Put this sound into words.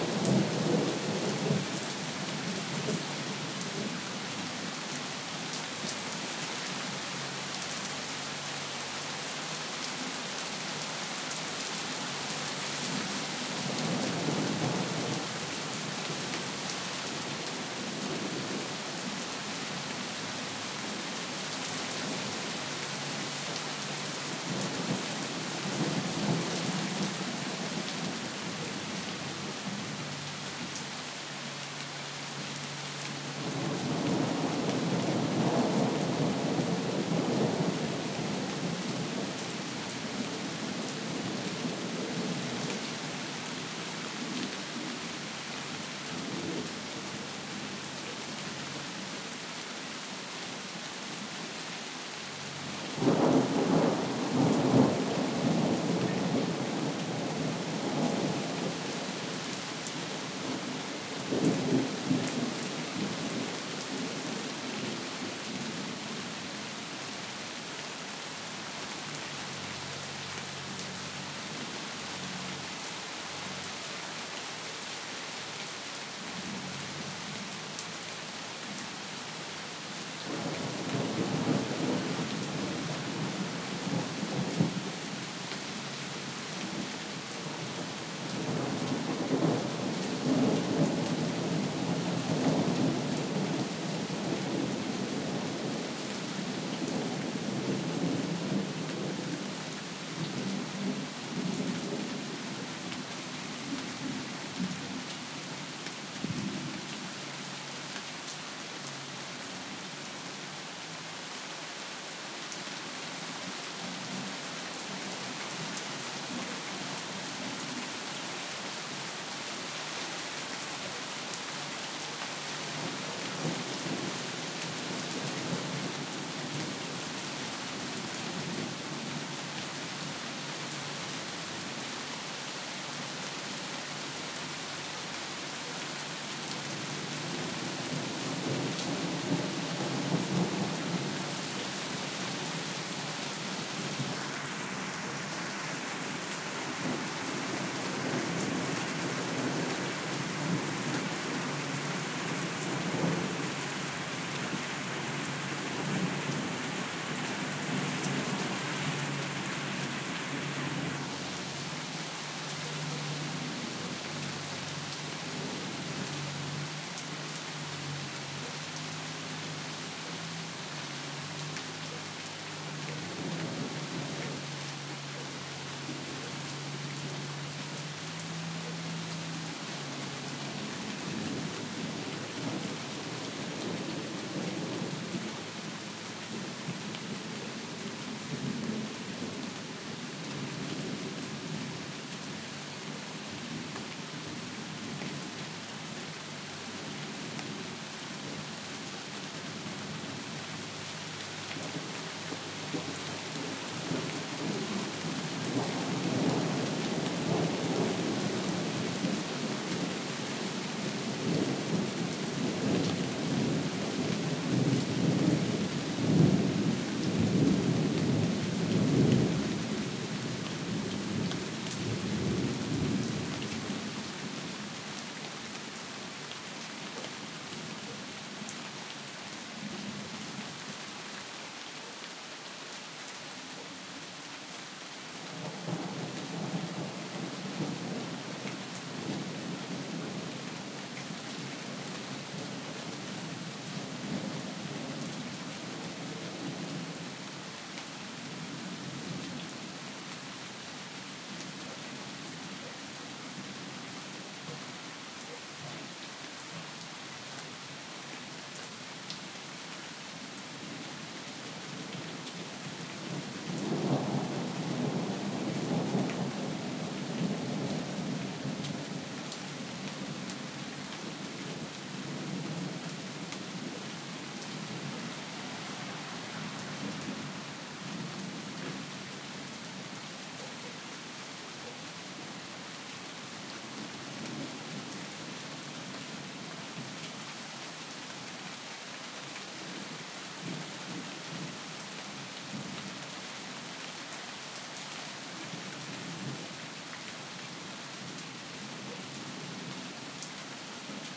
thunder storm mild raining
Thunderstorm with rain in the Netherlands
storm, raining, mild, thunder